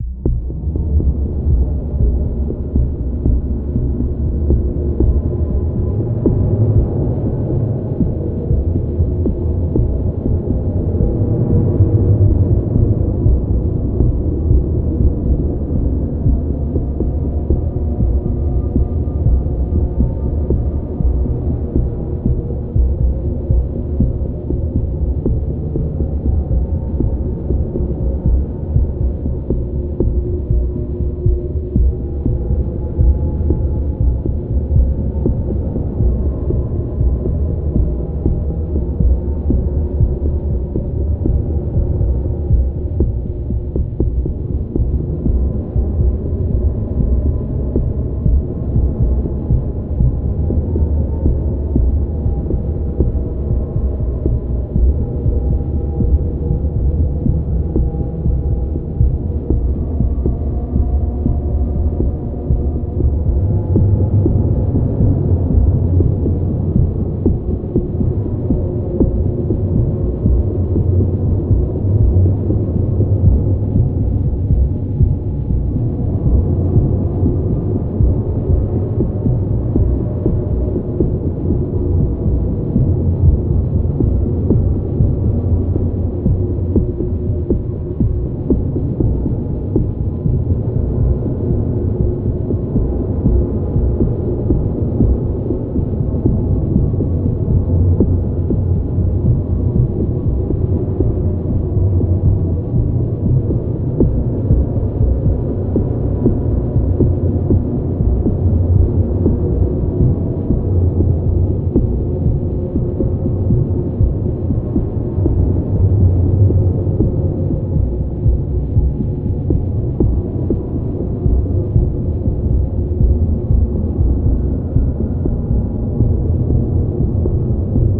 Windy Dramatic Drone Cinematic Atmo Background
Drone, Atmo, Dark, Cinematic, Movie, Ambient, Film, Background, Atmosphere, Dramatic